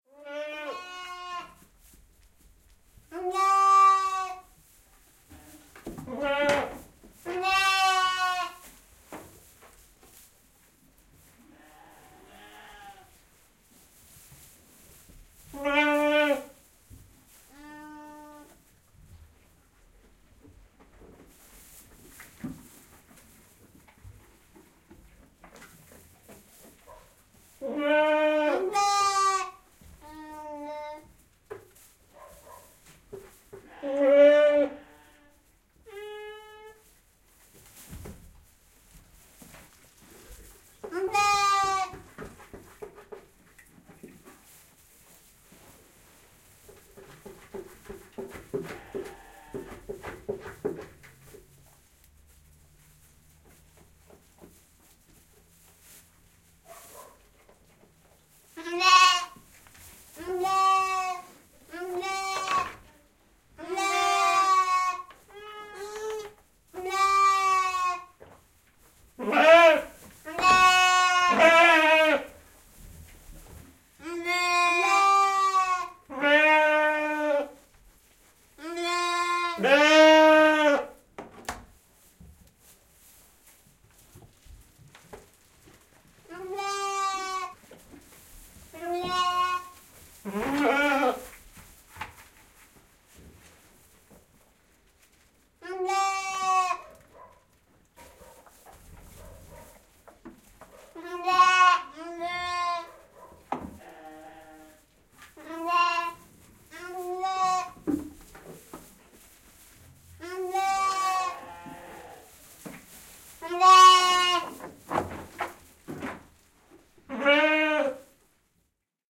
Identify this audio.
Karitsat määkivät karsinassa / Lambs bleating in a stall, small pack
Kolme karitsaa määkii harvakseen sisällä karsinassa, olkien kahinaa.
Paikka/Place: Suomi / Finland / Kitee, Sarvisalo
Aika/Date: 14.04. 1991
Animals, Domestic-Animals, Field-Recording, Finland, Finnish-Broadcasting-Company, Karitsa, Lamb, Lammas, Sheep, Soundfx, Suomi, Tehosteet, Yle, Yleisradio